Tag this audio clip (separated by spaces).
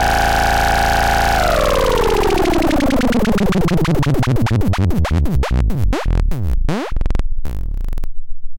alarm digital glitch nord synthesis wind-down